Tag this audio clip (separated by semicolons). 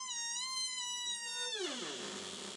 open; opening